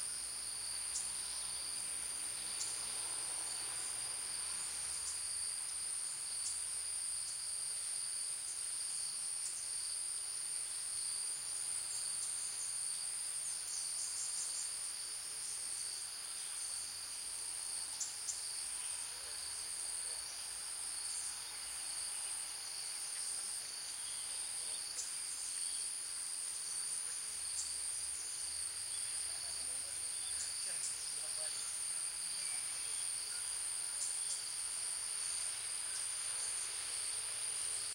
Thailand jungle night crickets dense high-pitched lowcut +some bg voices very high freq